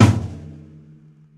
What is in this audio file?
tom med
a percussion sample from a recording session using Will Vinton's studio drum set.
mid, percussion, studio, tom